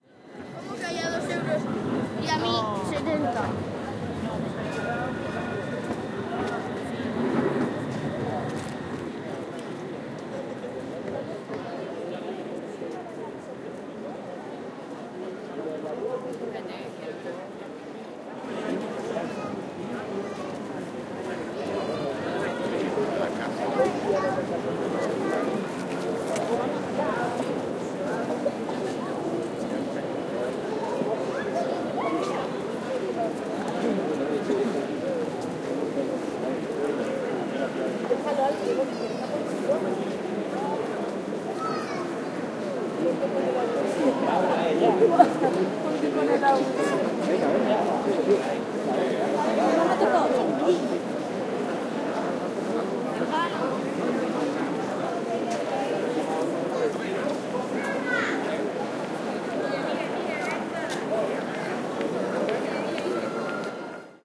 Lively street ambiance in downtown Seville, people talking in Spanish. Soundman OKM mics into Sony PCM M10